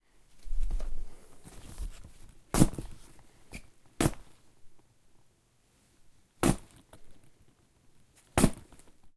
This Foley sample was recorded with a Zoom H4n, edited in Ableton Live 9 and Mastered in Studio One.
cloth, clothes, clothing, cotton, fabric, field-recording, foley, material, mic, microphone, motion, movement, moving, pants, rustle, rustling, shirt, sound-design, textile